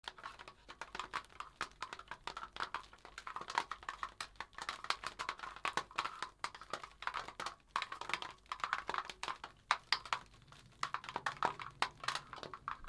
Plastic rustle
I created this sound to mimic the sound of soldiers walking, only the sound of their gear moving and rattling. I created it moving some electric cables :)
plastic, walking, weapons, rattling, uniform